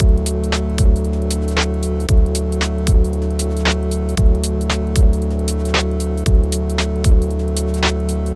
time break no high note
Same sounds as time break but without the higher note towards the end
115-bpm, Beats, Drum, Drum-break, electronic, loop